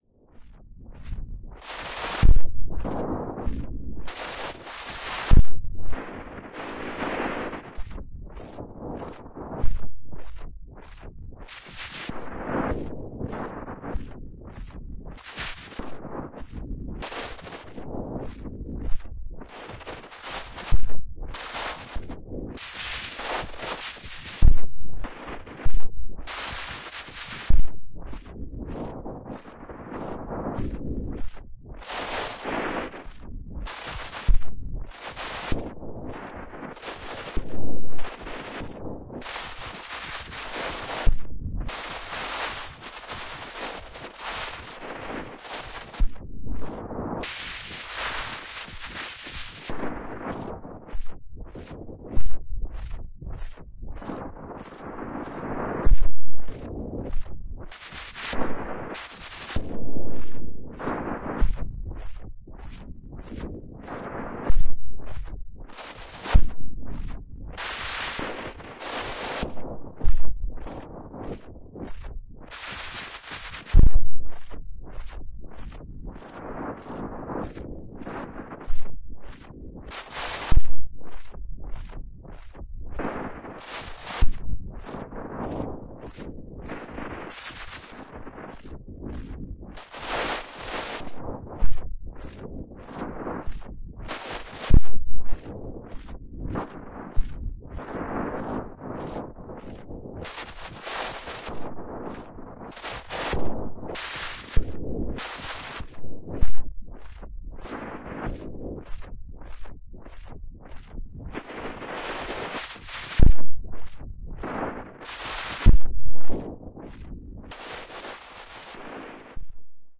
Noise Garden 29
1.This sample is part of the "Noise Garden" sample pack. 2 minutes of pure ambient droning noisescape. Noise bursts with some filtering.